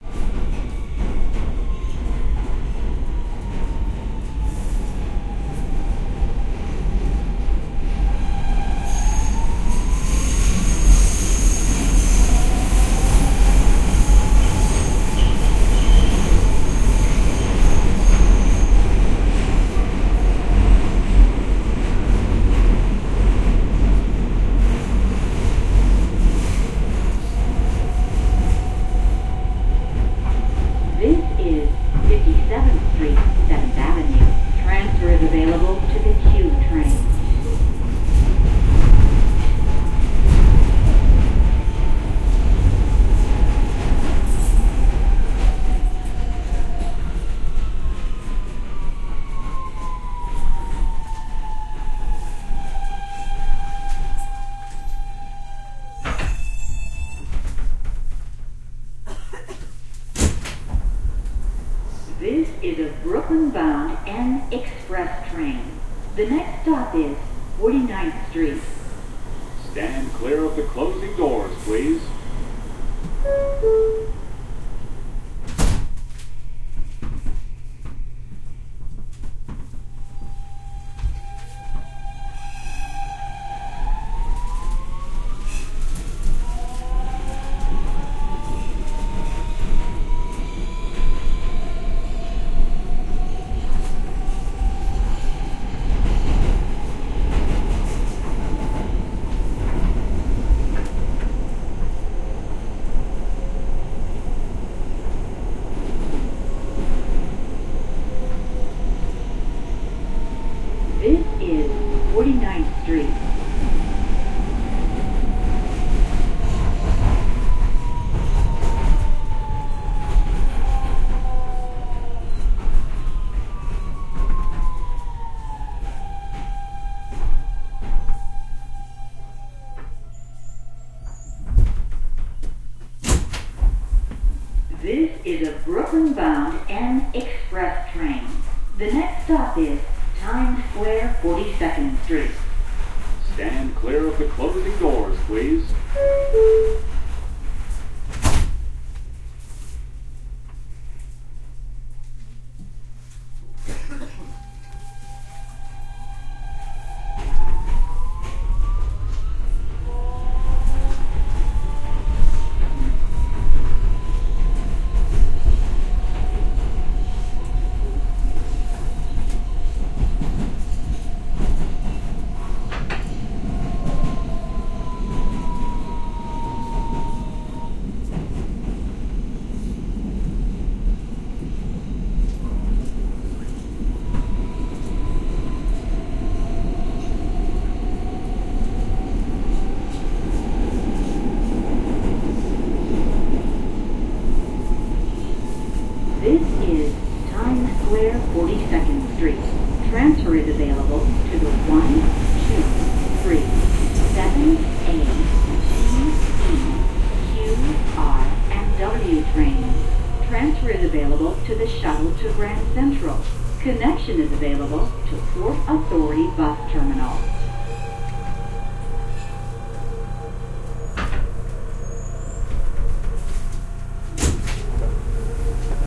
Just a quick recording on the N train as it travels from 57th street to 42nd street. Can't remember what I recorded it on. Possibly the Mtrack. I have tons of subway stuff. Shout out if you need help with some.